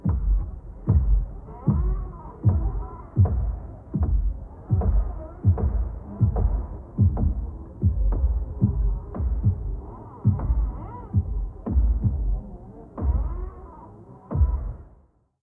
viola pizzicati 11
viola processed samples remix
pizzicato, viola, transformation